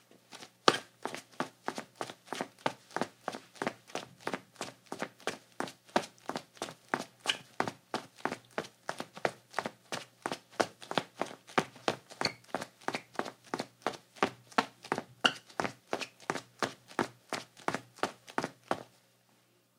01-22 Footsteps, Tile, Slippers, Running
Slippers on tile, running
fast, footstep, footsteps, linoleum, male, run, slippers, tile